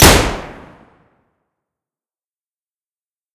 A firing SFX from a battle rifle, artificially created with Audacity.
Battle, Gunshot, Rifle